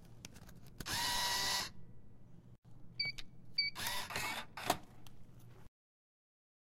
dispensive machine
machine, mechanical, Operation